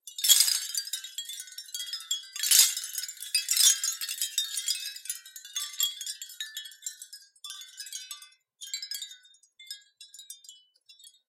Wanted to make a beautiful newage synth pad with spacious windchimes.
Recorded with a Sony IC recorder, cleaned up using Edison in Fl Studio.
sony-ic-recorder, windchime, relaxing, newage